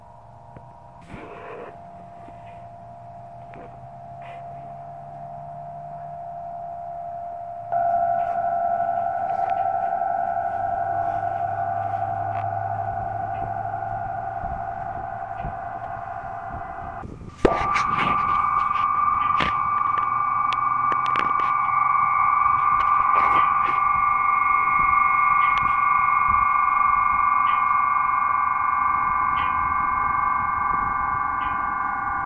sound sculpture noise troy ohio002
Michael Bashaw of Puzzle of Light. Wind was resonating the strings and you can hear sirens and traffic noise throught the frame.
metal, resonating, sculpture, strings, vibrations